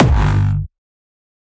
Hardstyle (Bass) Kick 9
My newest Kick. This time its a "reversed bass" Kick even if the bass sound is made with a synth rather than a reversed kick.
Bass, Distortion, Drums, Hardcore, Hardcore-Kick, Hardstyle, Hardstyle-Kick, Kick, Kick-Bass, Rawstyle, Rawstyle-Kick